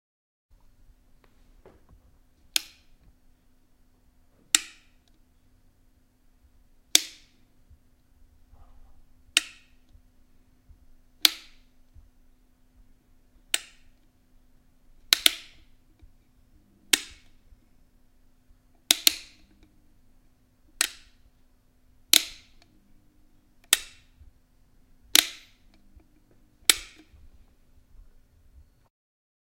A recording of an electric stove knobs turning.